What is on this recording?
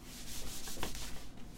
Field-Recording Water Animals